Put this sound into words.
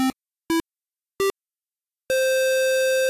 I generated four square tones with increasing silence in between with audacity. The tones are the C major scale. It is very useful in old video games when you win or do something good.